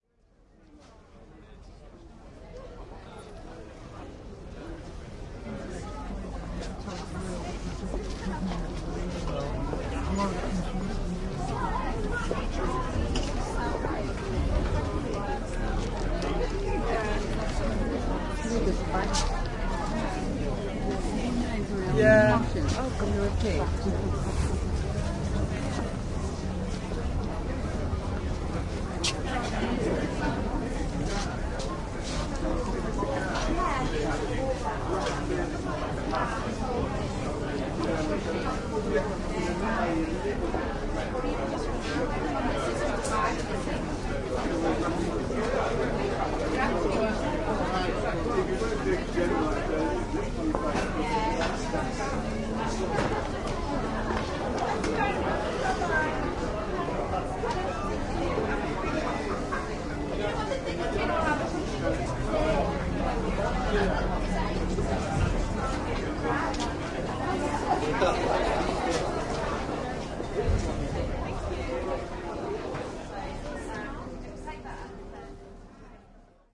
Out and about in York, UK, with a minidisc recorder at a street market. The city is famous for it's markets and diverse buskers performing a wide range of styles. Recorded Nov 8th 2008.
market, busker, street, york, crowd, shopping, english, ambient